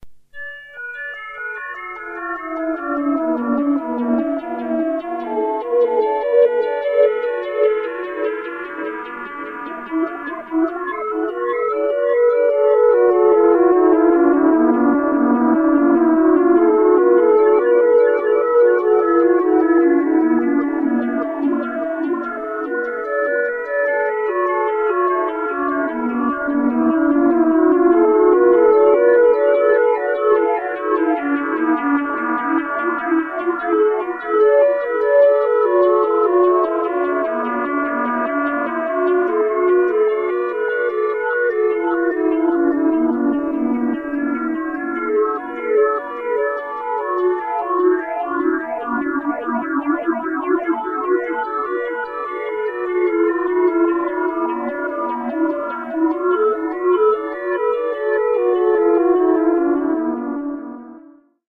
1 of 5 hypnotic drones. About 1 minute long each,quite loopable drones / riffs for all discerning dream sequences, acid trips and nuclear aftermaths.